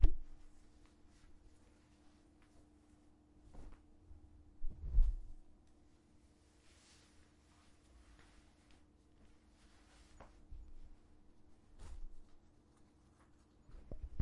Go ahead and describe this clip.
Changing clothes inside the room
change, clothes, clothing, wear